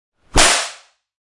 switch crack
layered foleys(5). Approximate sound of the crack of a switch.